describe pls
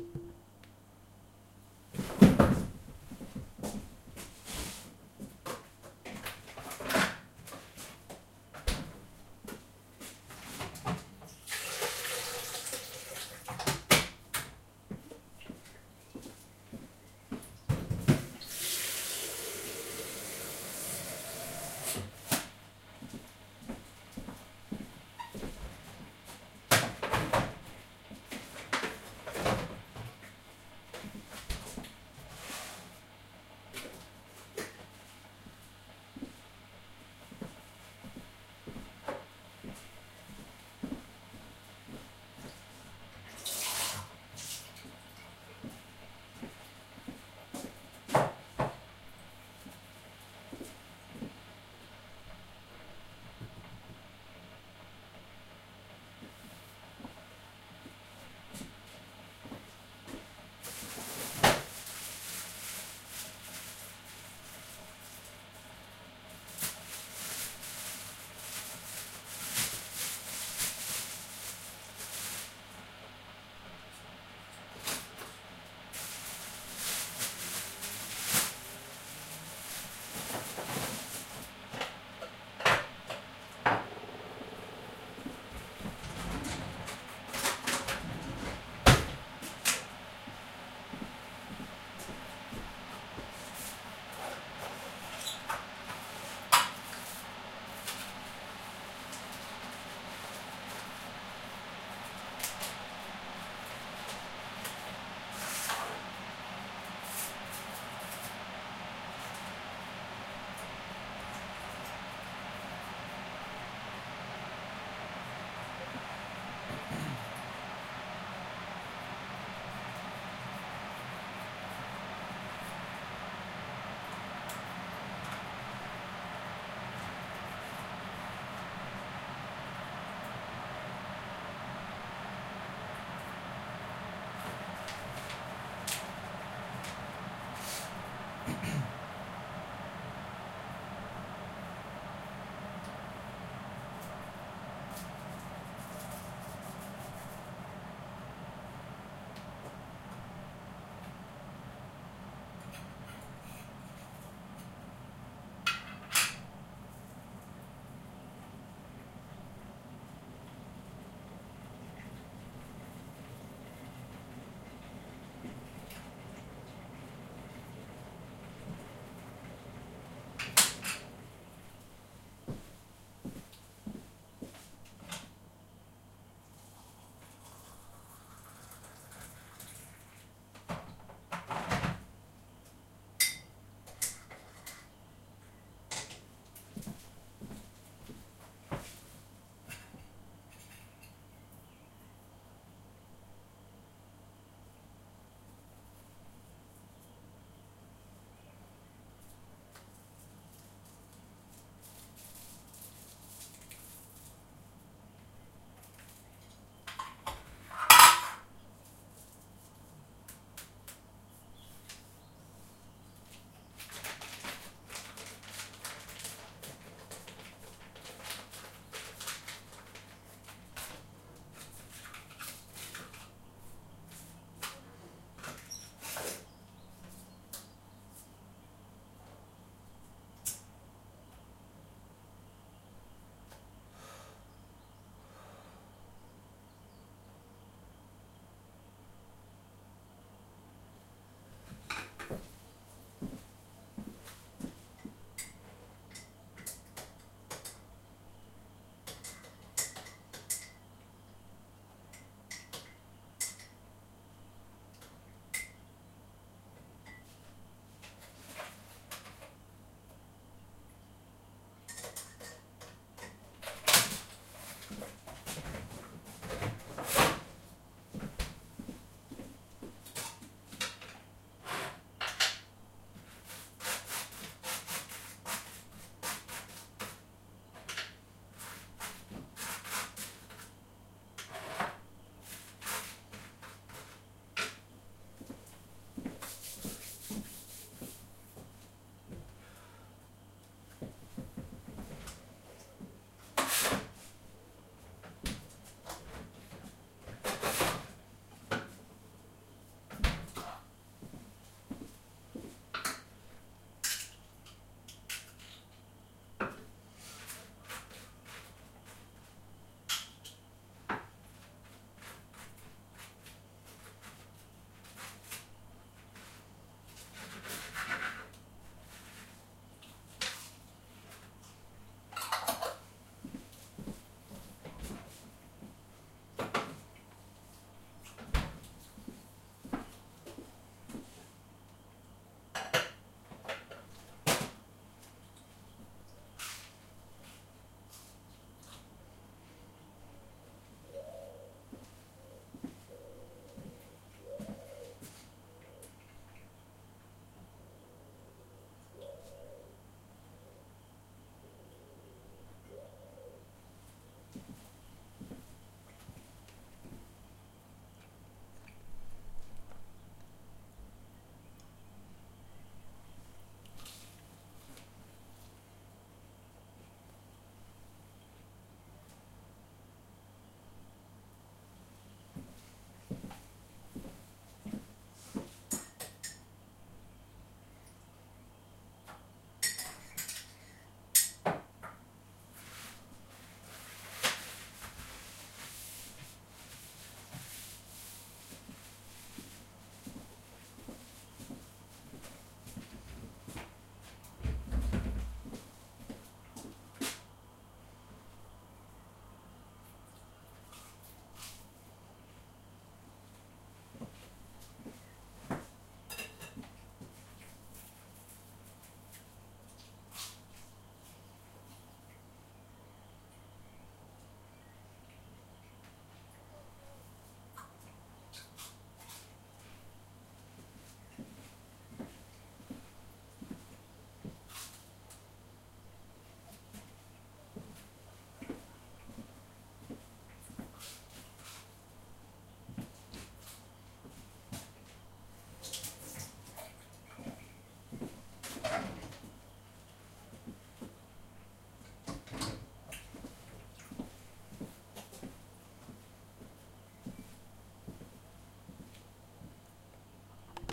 Recording of an empty kitchen with window open, city scape and birds can be heard in distance.